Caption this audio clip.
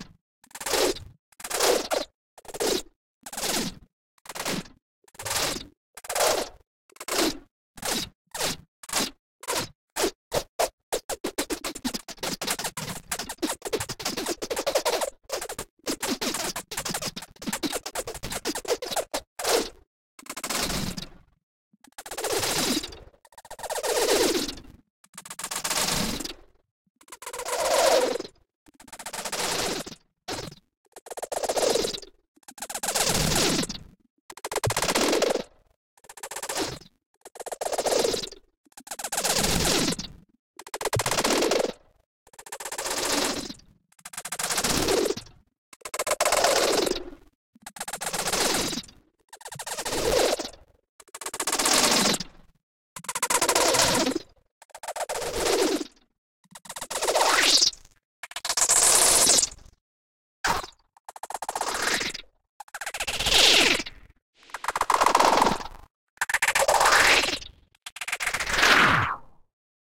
Digital Nanobot Foreplay
Two messy digital grasshoppers covered in bytes going at it binary style.
nanobot, electronic, foreplay, digital, abstract